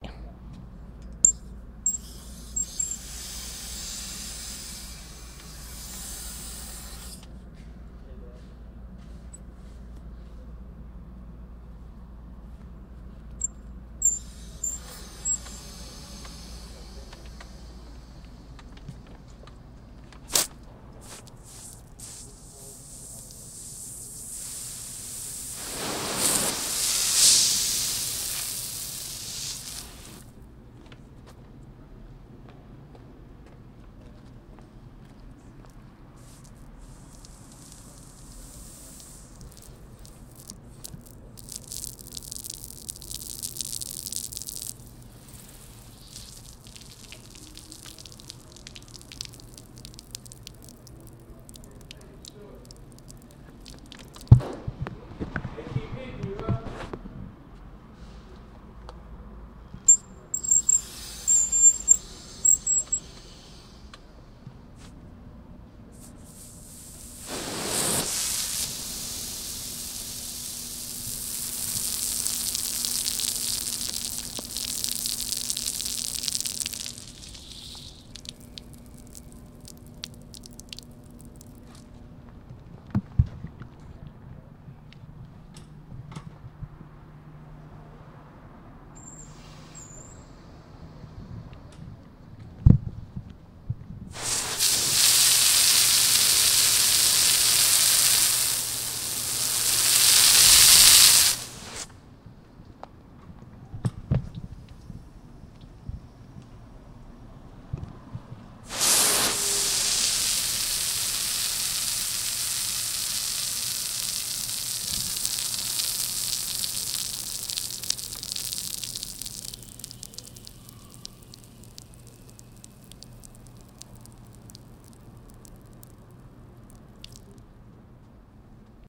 Hose Sounds
I opened a water valve that squeeks a lot. The hose it fed lives inside a large open air garage and I sprayed the concrete with it. I did short bursts and also let it trickle out after i turned the hose off.
Curious what you guys do with this sound!
splash, opening-valve, spraying-water, spraying-concrete, trickling-water, faucet, valve, water, Hose, stream